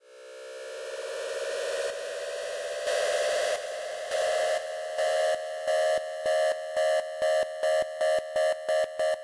Starting Protocol Effect 01
Starting protocol of a unknown machine.
Thank you for the effort.
computer, effect, game, machine, original, procotol, sample, sound, unknown